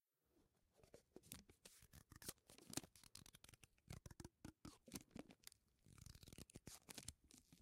This one is odd. I took a strip of tape and ran my thumbs across it while holding it tense.